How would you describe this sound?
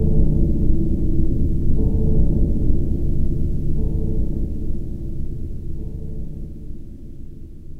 a dark drowsy stab from a horror film i scored; made in Native Instruments Kontakt and Adobe Audition